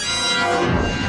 scarysplit - cut1rev
aggrotech, industrial, noise, scary